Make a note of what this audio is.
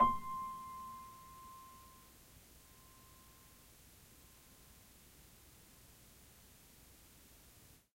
Tape Piano 13
Lo-fi tape samples at your disposal.
collab-2, lo-fi, lofi, piano, tape, vintage